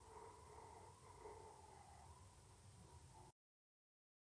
humo que queda después de un gran incendio